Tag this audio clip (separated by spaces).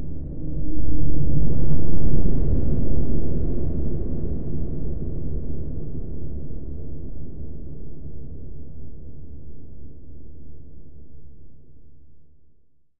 drone long-reverb-tail ambient deep-space